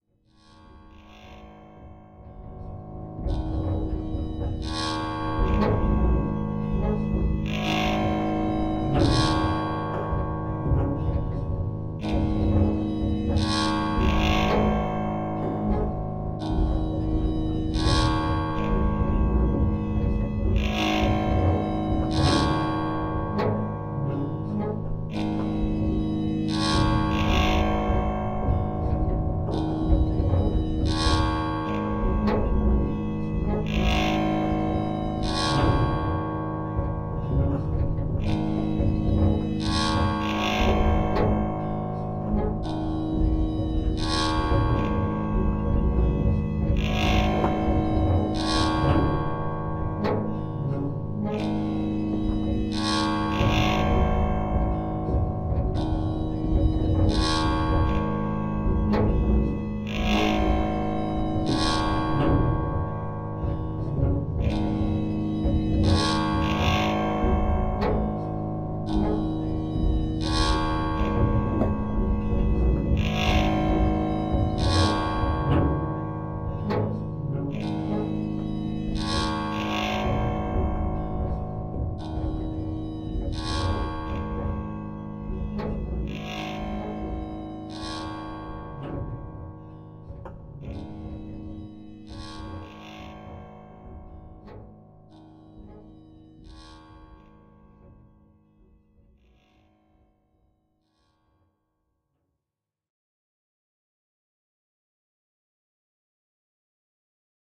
Ebow drone multi
Three different sounds I have recorded, played together in a software sampelplayer. Sounds recorded with ZOOM H1.
atmosphere, dark, deep, drone, ebow, effect, electro, electronic, experimental, field-recording, fx, multi-sampled, noise, pad, sound-design, sound-effect, soundscape, space